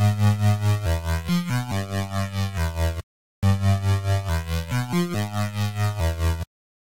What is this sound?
oscilation2step
dubstep synth that oscillates every second beat at 140bpm. to be used with (if desired) with bass wobble from this pack.
140, 2step, 320, dubstep, oscillation, synth, wobble